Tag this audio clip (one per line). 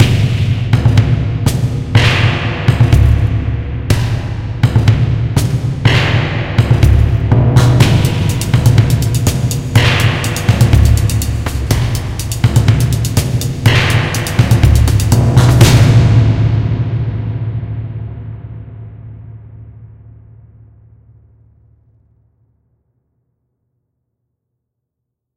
drums
kong